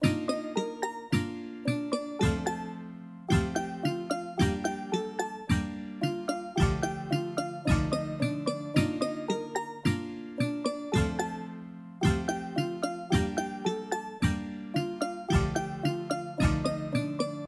SFX for the game "In search of the fallen star". This is the song that plays during the platforming section.